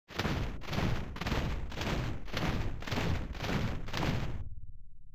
Marching creatures
A group of marching aliens. Created in Ableton Live
aliens, animation, big, creatures, game, group, marching